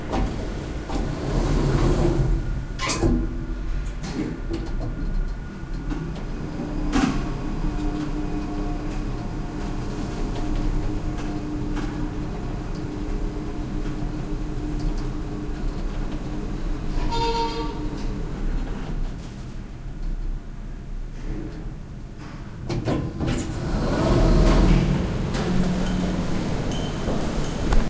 Driving up with the elevator, door opens, spacious noise.